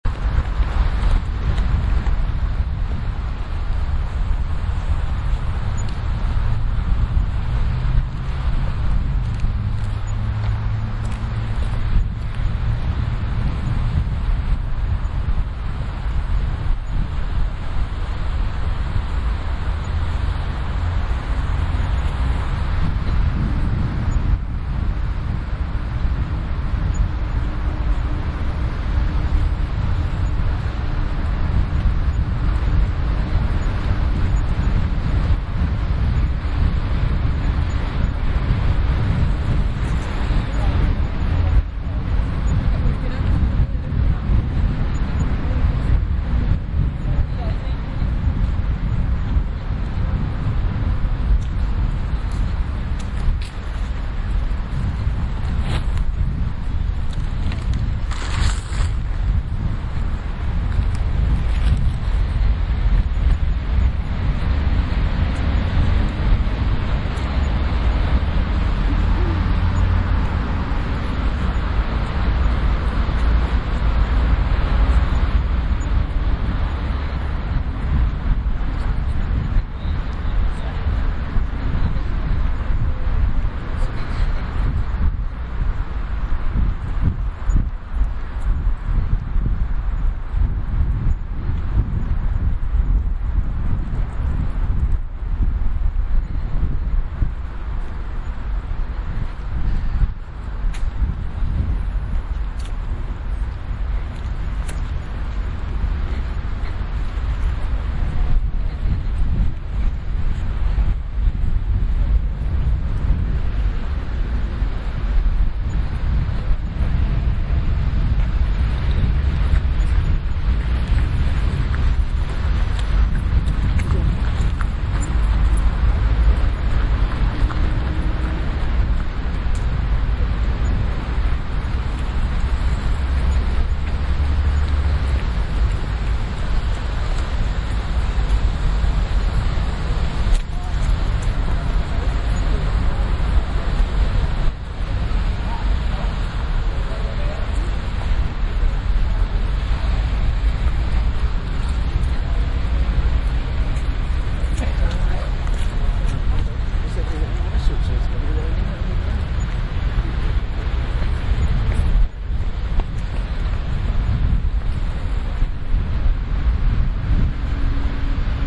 Hyde Park Corner - Walking through Park
ambient, ambiance, general-noise, city